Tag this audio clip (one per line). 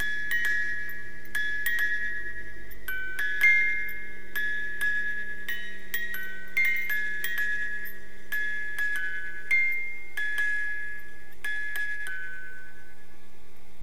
antique
lo-fi
music-box
silent-night
wind-up